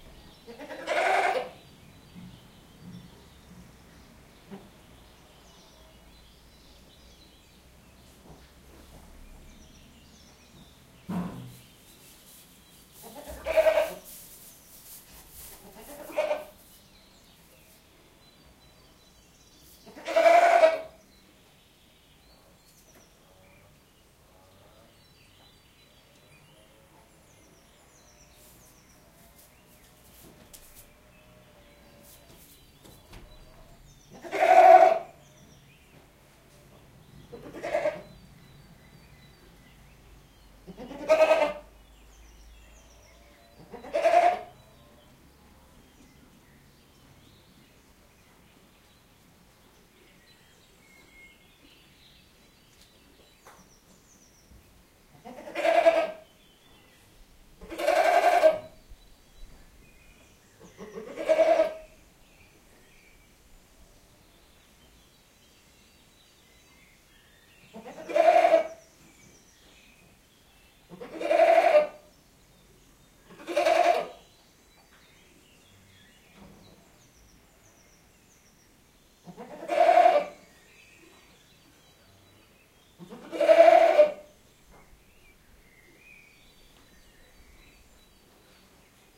Recorded by Zoom H2N in a forest with other animals..
ambiance, ambient, Bleat, nature, Animals, chant, soundscape, Goat